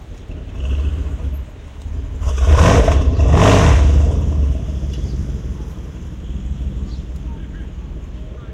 v8 engine rev
Throaty V8 engine blipping its throttle and showing off its horsies, plus some idling.
This recording was done with binaural mics, and will sound best on headphones.
File was edited for fade in/out, hi pass filter